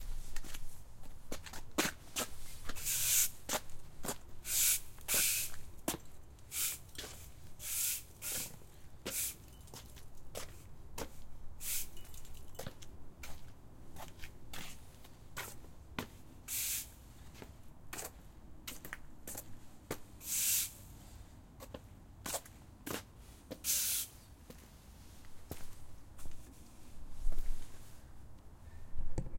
Walking upstairs in slippers
floor, walks, walking, steps, stairs